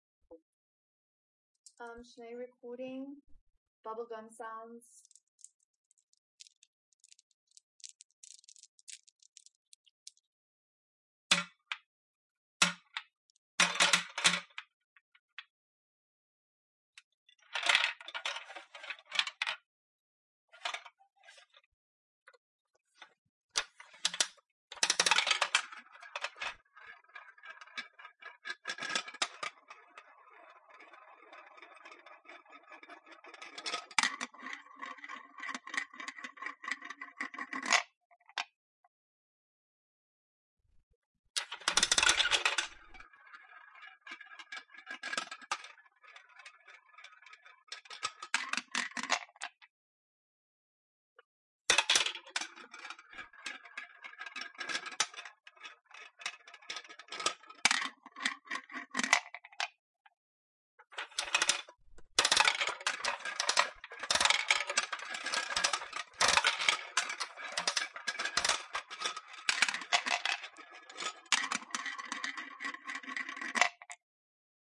Bubblegum Machine Variations
Using a bubblegum machine in various ways, by throwing in bubblegum, turning in a coin for gum, it spiralling down and landing in the bottom slot.
action, bubblegum, down, gum, machine, machinery, metal, OWI, plastic, robotic, roll, slot, spiraling, stile, throw, whir